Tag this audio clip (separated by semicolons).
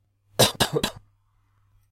cold; cough